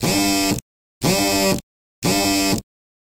Loopable recording of a Nexus 6 cell phone vibrating on a glass top table. Recorded with my Zoom H6.